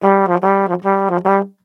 EQ-Tru124 Trumpet
DuB HiM Jungle onedrop rasta Rasta reggae Reggae roots Roots
HiM, Jungle, reggae, DuB, onedrop, roots, rasta